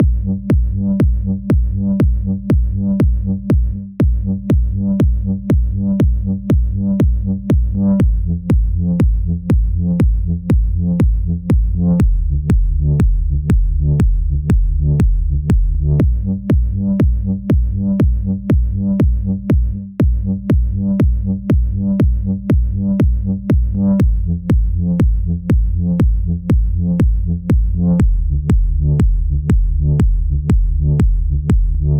Kick and Bass Techno 2
Kick and Bass Techno loop
rave, bass, kick, electronic, house, trance, club, dance, electro, loop, techno